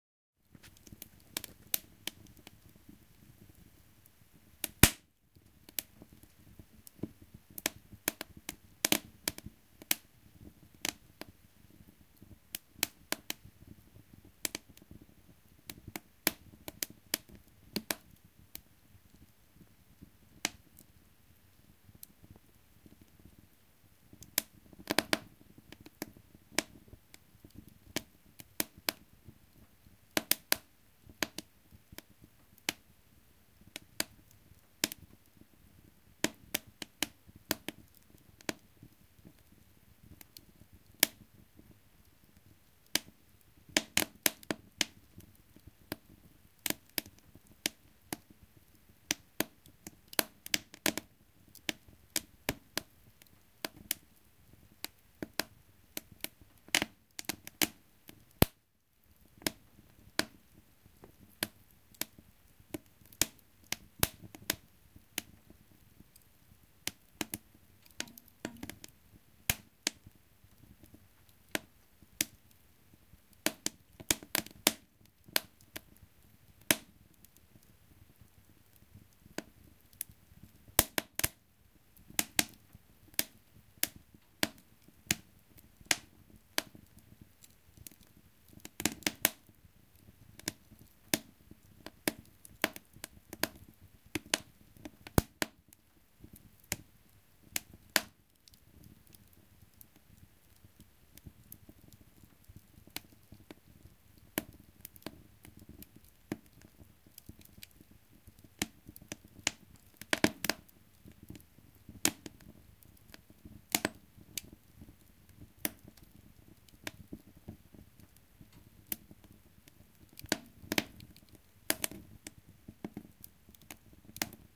1950s Rapid No 20 woodstove
Pine and juniper fire in 1950s "Rapid No. 20" kitchen woodstove.
woodstove, flame, cast-iron, pine, fire, juniper, crackle, fireplace, sparks, burning, burn, flames, field-recording, crackling